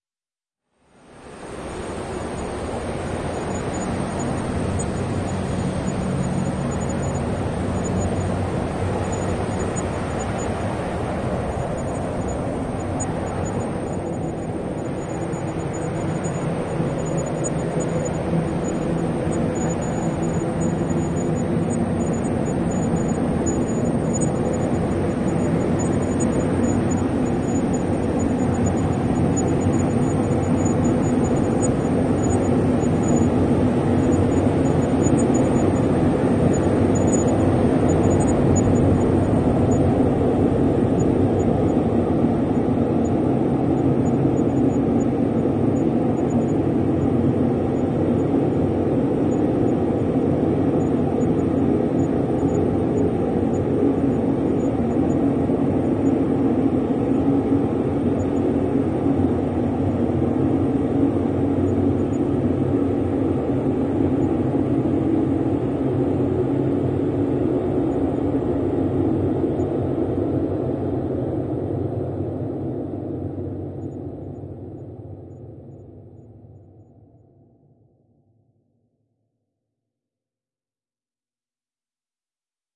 LAYERS 003 - Helicopter View - F#1
LAYERS 003 - Helicopter View is an extensive multisample package containing 73 samples covering C0 till C6. The key name is included in the sample name. The sound of Helicopter View is all in the name: an alien outer space helicopter flying over soundscape spreading granular particles all over the place. It was created using Kontakt 3 within Cubase and a lot of convolution.